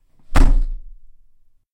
A wooden internal door being slammed.
Recorded with a Marantz PMD-661 with built-in microphones, for A Delicate Balance, Oxford Theatre Guild 2011.